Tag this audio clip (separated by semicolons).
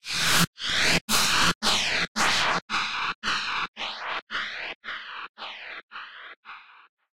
ableton; processed; sweep